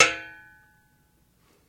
The sound of a metal folding chair's back being flicked with a finger.